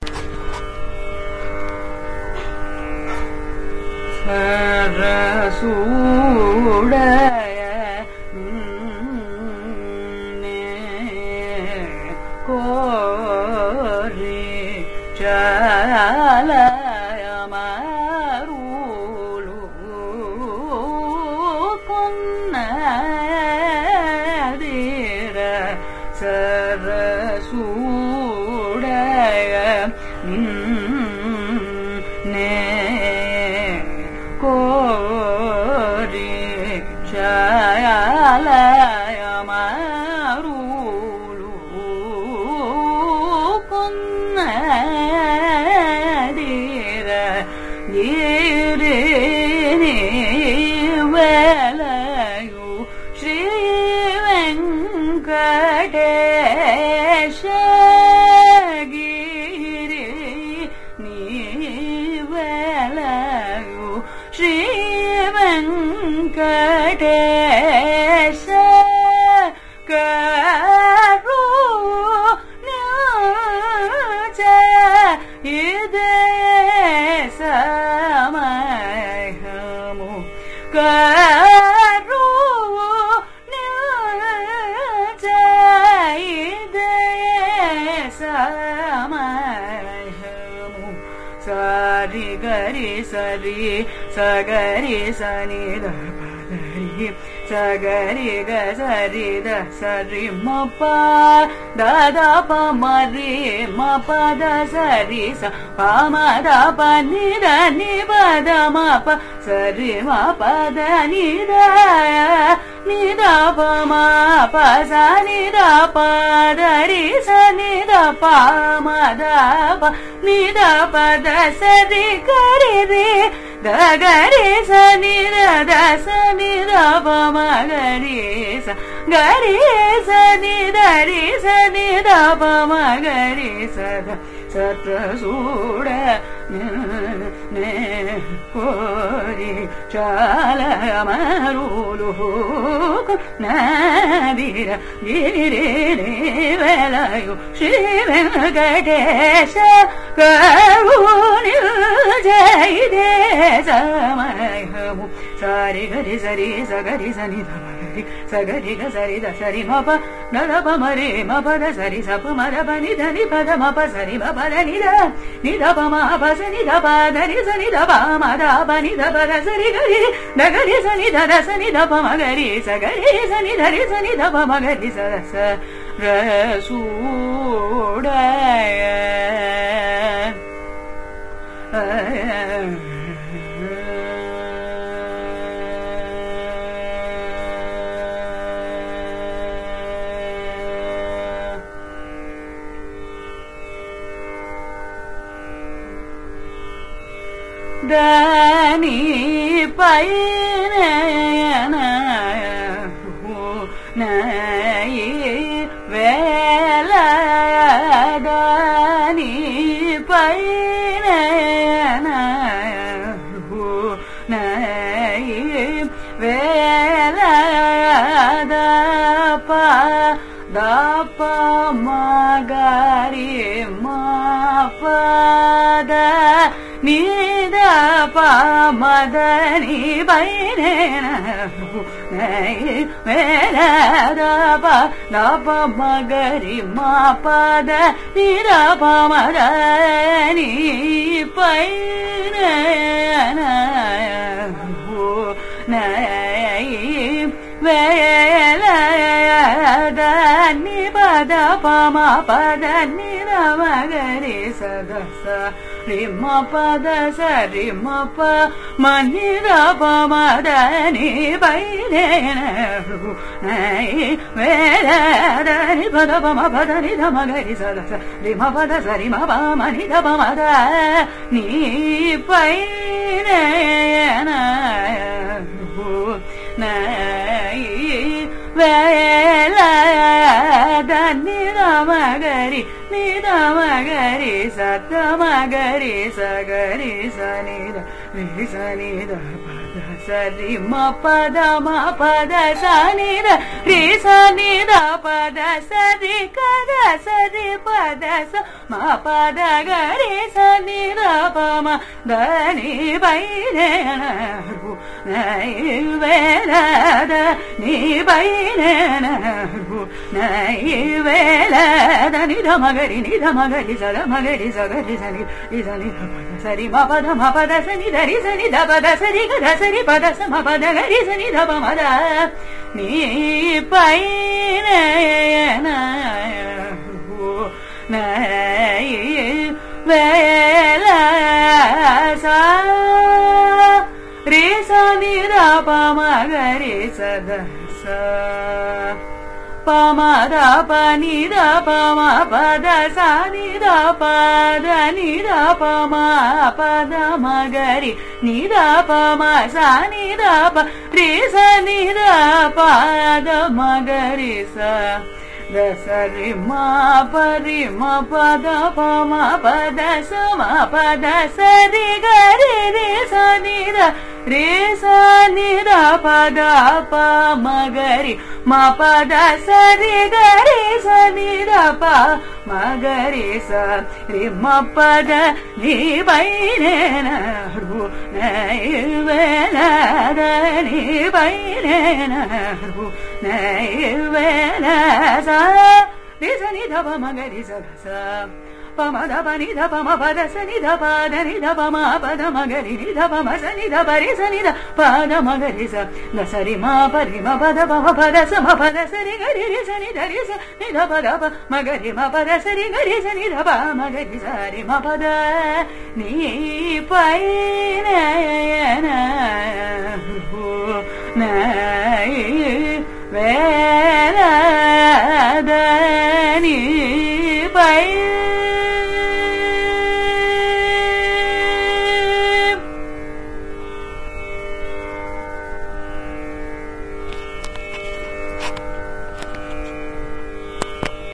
Carnatic varnam by Dharini in Saveri raaga
Varnam is a compositional form of Carnatic music, rich in melodic nuances. This is a recording of a varnam, titled Sarasuda Ninne Kori, composed by Kotthavaasal Venkatrama Iyer in Saveri raaga, set to Adi taala. It is sung by Dharini, a young Carnatic vocalist from Chennai, India.
carnatic-varnam-dataset, iit-madras, varnam, carnatic, music, compmusic